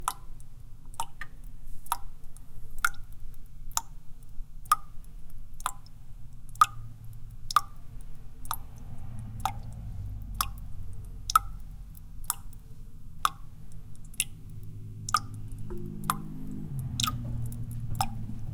Kitchen sink - dripping faucet
A kitchen sink faucet dripping into the dishes below.
drip, dripping, faucet, foley, kitchen, running, sink, water